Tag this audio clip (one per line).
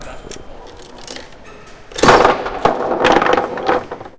coins
campus-upf
UPF-CS14
foosball
field-recording